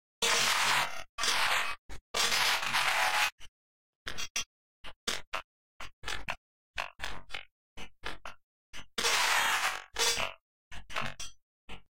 One in a small series of weird glitch beats. Created with sounds I made sequenced and manipulated with Gleetchlab. Each one gets more and more glitchy.
sound-design, electronic, weird, snare, beat, click, glitch, drum, bass